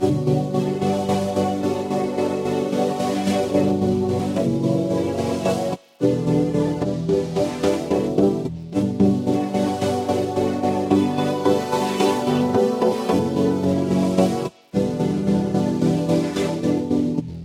Some Keys
Nice little loop made with Serum
130-bpm, beat, cleaner, container, drum, future, garbage, groovy, hoover, house, improvised, Keys, loop, percs, percussion-loop, pipe, quantized, rhythm, rubbish, sandyrb, saw, tune, vacuum